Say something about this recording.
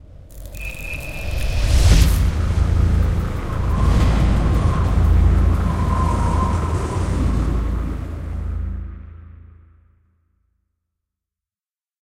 Freezing Logo
Simple and short logo made with a blizzard sound recording and a futuristic sounding arp u can use as your intro for your videos.
Composed in Ableton
-Julo-
freezing, ident, intro, logo, music, outro, short